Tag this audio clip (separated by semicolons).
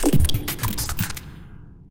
cyborg; clack; hi-tech; robot